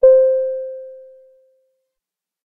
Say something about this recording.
A simple synthesized sound: my attempt at creating an elevator ding. Would also work as an airplane "bing" perhaps. Created with a Nord Modular synth.
aircraft, airplane, alert, bing, ding, elevator, event, ping, ring, seatbelt